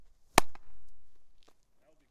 Glove Catch 3 FF012
1 quick glove catch. medium pitch, medium smack.
ball, catch, glove-catch